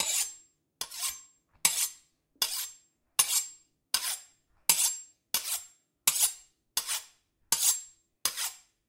Rubbing two knives together to simulate sharpening a knife with a sharpening steel.Recorded with Rode NTG-2 mic into Zoom H4 recorder.
slice, steel, sharpening, sharpen, kitchen, knife, scrape, foley, ring